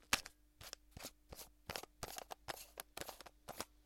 Plastic bottle closure 1
Plastic bottle closure screwing.
{"fr":"Bouchon de bouteille en plastique 1","desc":"Fermeture d'un bouchon de bouteille en plastique.","tags":"bouteille plastique bouchon visser fermer"}
plastic, closure, screw, bottle